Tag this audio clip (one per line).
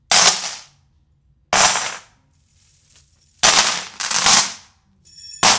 chain
drop
floor
rhythmic
wooden